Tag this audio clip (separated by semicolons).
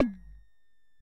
ac; analog; analouge; cable; current; dc; electro; fat; filter; filterbank; noise; phat; sherman; touch